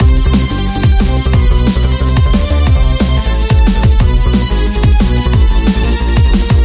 Arakawa DnB V2
A set of drums and synths in this fast loop. Remastered version with a more soft sounding snare.
drum, dnb, drum-and-bass, 180bpm, modern, drum-loop, loop, beat, drumbass